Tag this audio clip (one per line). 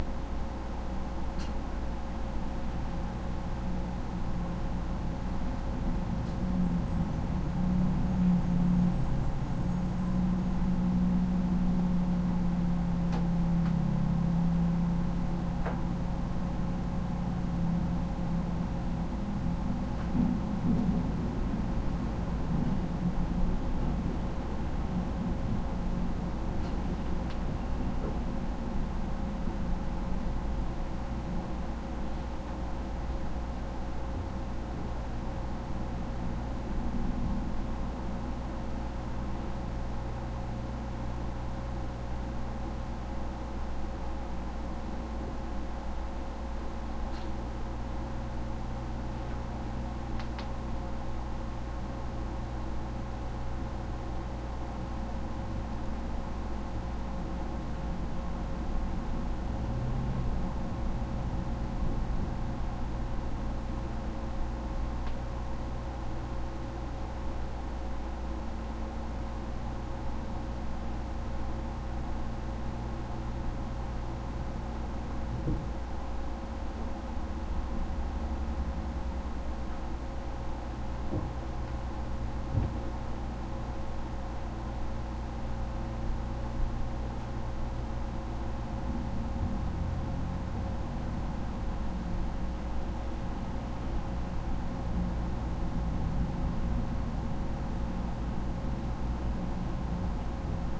or
just
corner